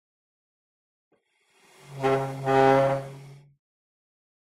Displacement chair
chair, room